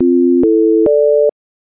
siren-01-dreamy
This short sound could be used to make sounds with an ambient theme. It can be cut to make it better for your sounds, and you even can ask me a variant.
This sound made with LMMS is good for short movies.
I hope you to enjoy this, if you need some variant I can make it for you, just ask me.
---------- TECHNICAL ----------
Vorbis comment COOL: This song has been made using Linux MultiMedia Studio
Common:
- Duration: 1 sec 718 ms
- MIME type: audio/vorbis
- Endianness: Little endian
Audio:
- Channel: stereo
ambient, danger, dream, dreamy, emergency, instrumental, short, signal, siren, warning